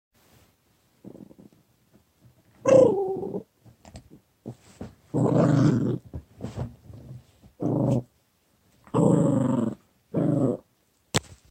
my friend's pupper making noises. Can probably be edited to make a monster growl or something.
small, growl, dog, puppy, creature, canine, dogs, barking, playful, cute, animal, monster, bark, growling, pet